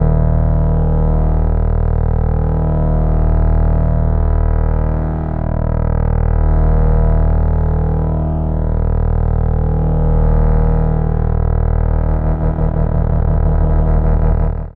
basscapes Phisicaldrone
a small collection of short basscapes, loopable bass-drones, sub oneshots, deep atmospheres.. suitable in audio/visual compositions in search of deepness
pad, sub, soob, ambience, electro, bass, drone, spooky, film, deep, dark, soundscape, atmosphere, soundtrack, score, boom, creepy, rumble